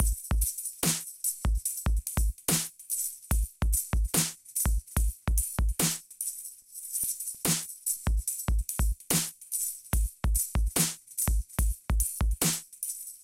Dubstep loop 145BPM
hat, hi, 145, drums, hihats, kicks, drumstep, snares, dnb, drumnbass, bpm, drumandbass, dubstep